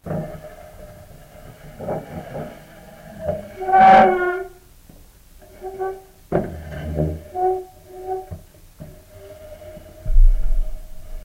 dragging wooden chair on wooden floor
dragging, wood